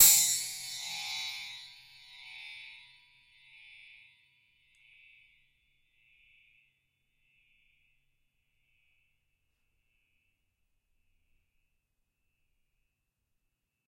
Clang violent swing 2
A metal spring hit with a metal rod, recorded in xy with rode nt-5s on Marantz 661. Swinging backwards and forwards